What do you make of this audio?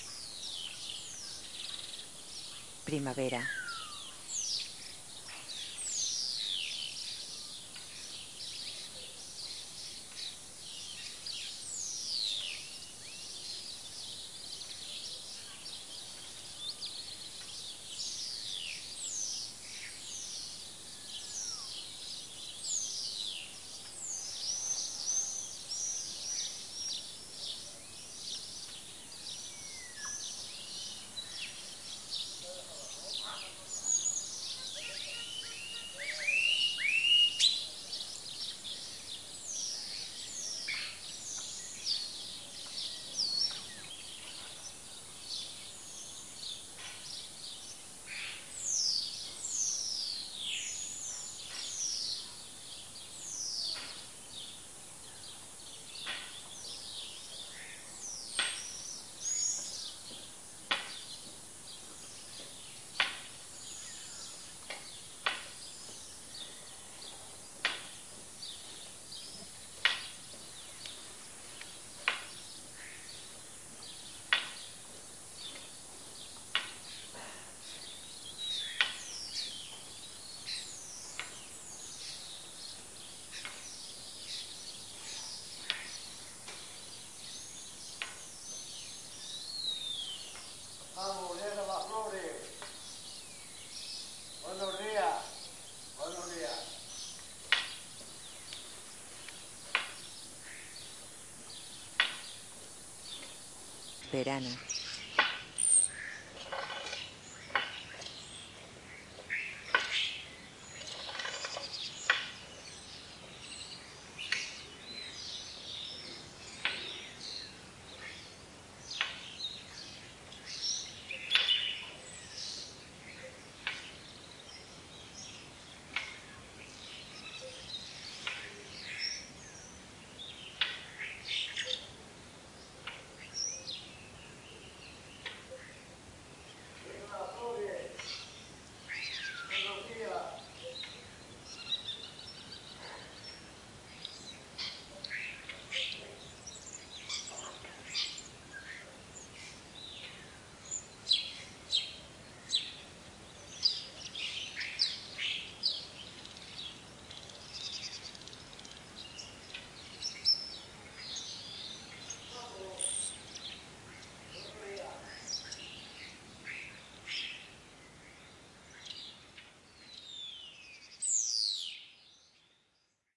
Saludo en la calle :: Greeting on the street
Persona con bastón andando por la calle. Saludos de vecinos, vencejos, estorninos, verdecillos, gorriones. En dos estaciones diferentes, primavera y verano
Person with walking stick walking down the street. Greetings from neighbors, swifts, starlings, greenfinches, sparrows. In two different seasons, spring and summer.
ZOOM H2